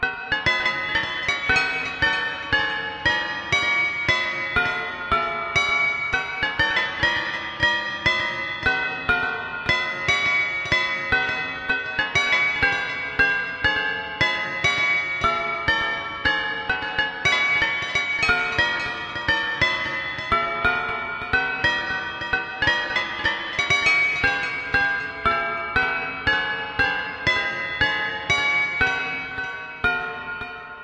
Psycho Killer Alarm Bell Loop
Good loop if your looking for that real creepy something bad is about to happen kinda' feel. ~ Created with Audacity and some tuned aluminum bowls and sped up.
alarm, bells, chiller, cinematic, creepy, drama, freaky, horror, killer, psycho, spooky